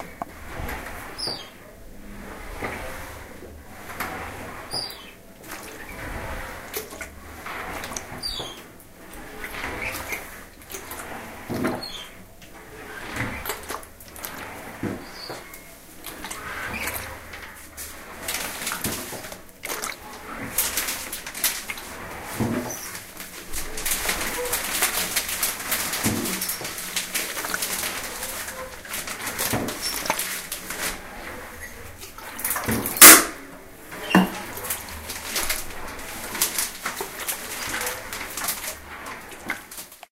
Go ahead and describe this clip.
paulien&emily
This is a result of a workshop we did in which we asked students to provide a self-made soundtrack to a picture of an "objet trouvé".
bruitage, field-recording